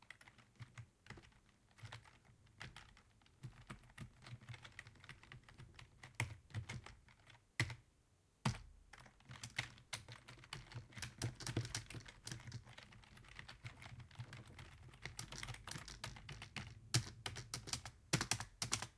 Typing External Keyboard 3
Computer, External, H1, Keyboard, Typing, Zoom